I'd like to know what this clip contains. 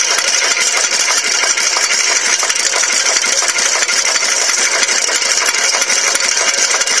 An old home made tractor. Sound recorded with a digital camera (low quality)
monocylinder-engine, tractor-engine